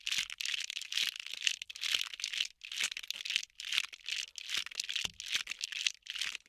I use a lot of cable covers to protect various cables in my house. They make a rather interesting bone rattling sound.